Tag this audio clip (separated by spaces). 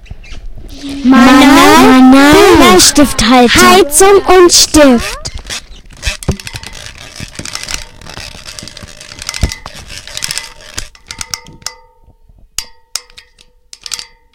Essen Germany January2013 SonicSnaps